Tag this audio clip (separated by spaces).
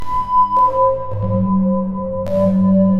greeting bell alarms doorbell